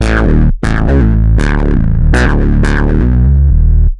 Electronic Bass loop